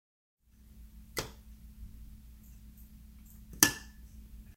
light switch being flicked on and off
flick, light, switch